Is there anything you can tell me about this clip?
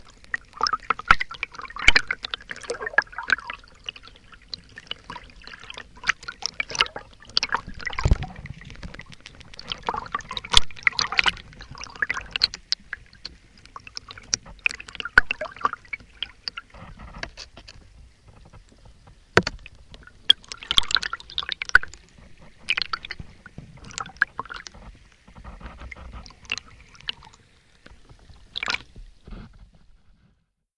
I wanted a recording of water that didn't include the sounds of the forest/city surrounding the water source but I don't have any directional mics. So instead I attached a contact microphone to a piece of acrylic and let the acrylic bounce around in the water (the contact mic was connected to the inpus in my Zoom 2 Hand Recorder). You can still hear some non-water sounds but they are the acrylic scraping the sand at the bottom of the creek/hitting a rock.